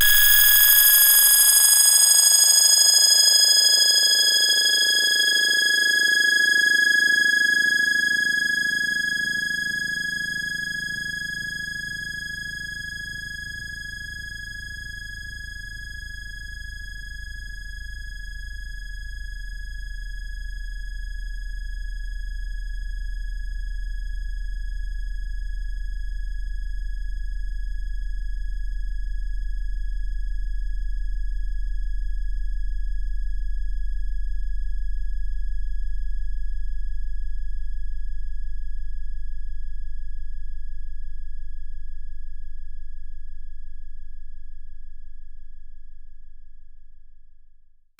Q Saw filter sweep - G#5
This is a saw wave sound from my Q Rack hardware synth with a long filter sweep imposed on it. The sound is on the key in the name of the file. It is part of the "Q multi 003: saw filter sweep" sample pack.